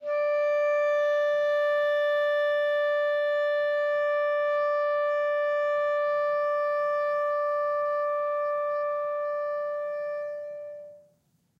One-shot from Versilian Studios Chamber Orchestra 2: Community Edition sampling project.
Instrument family: Woodwinds
Instrument: Clarinet
Articulation: long sustain
Note: D5
Midi note: 74
Midi velocity (center): 2141
Room type: Large Auditorium
Microphone: 2x Rode NT1-A spaced pair, 1 Royer R-101 close, 2x SDC's XY Far
Performer: Dean Coutsouridis
midi-note-74, woodwinds, single-note, vsco-2, multisample, midi-velocity-62, clarinet, d5, long-sustain